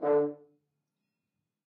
One-shot from Versilian Studios Chamber Orchestra 2: Community Edition sampling project.
Instrument family: Brass
Instrument: F Horn
Articulation: staccato
Note: D3
Midi note: 50
Midi velocity (center): 42063
Microphone: 2x Rode NT1-A spaced pair, 1 AT Pro 37 overhead, 1 sE2200aII close
Performer: M. Oprean
single-note, vsco-2, midi-velocity-105, multisample, f-horn, midi-note-50, staccato, brass, d3